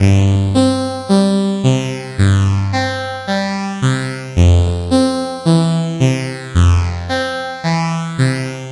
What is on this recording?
Part of the Epsilon loopset, a set of complementary synth loops. It is in the key of C major, following the chord progression Cmaj7 Fmaj7. It is four bars long at 110bpm. It is normalized.
110bpm, synth